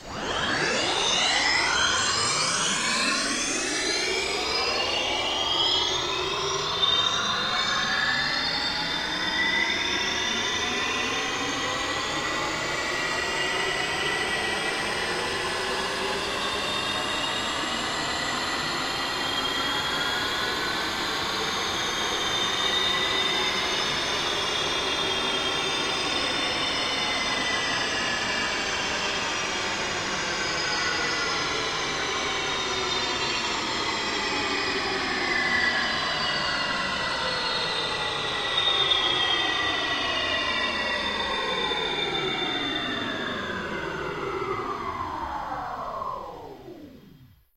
Future Transport 01
Swoosh and such. Pitch rising, sustaining, then falling.
ambience, atmosphere, sci-fi, sound-effects